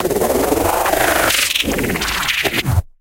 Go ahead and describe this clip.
This sound was created by processing my own footsteps with a combination of stuttered feedback delay, filter modulation (notched bandpass + lowpass LFO), and distortion (noise carrier + bit crushing).
drone, engine, factory, futuristic, industrial, machine, machinery, mechanical, motor, noise, robot, robotic, sci-fi